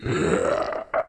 Zombie gargles 2
Zombie gargling sound
growl, undead, snarl, hiss, horror